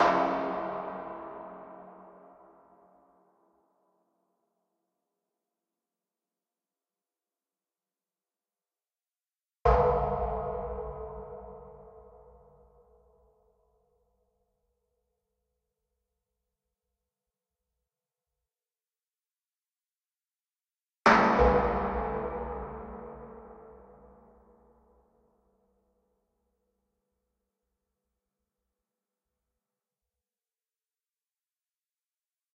Sample made in april 2018, during participatory art workshops of field-recording and sound design at La Passerelle library Le Trait d'Union youth center, France.
Sample 1
Cash register with multiple delays.
Sample 2
Piece on drum with slow audio.
Sample 3
Ride cymbal with reverb.
Sample 4
Torn paper with bitcrushing.
Sample 5
Trash bin percussion with reverb.
Sample 6
Quantized trash bin rythm.
Sample 7
Percussion on metal and shimmer
Landscape 1
Morning view from the banks of the Saone, around Trévoux bridge, France.
Landscape 2
Afternoon carnival scene in Reyrieux, France.
cinematic, reverb, percs, processed